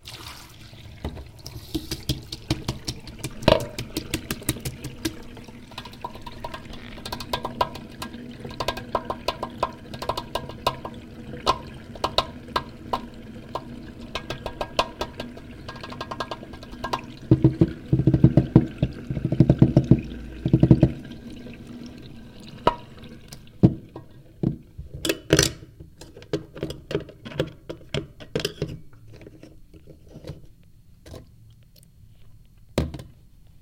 Drumming with my finger on a metallic, wet sink.
Recorded with Sony TCD D10 PRO II & Sennheiser MD21U.